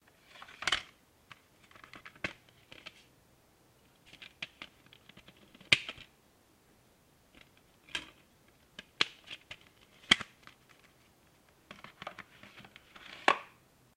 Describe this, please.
Opening a CD case
case, cd, close, compact, disc, open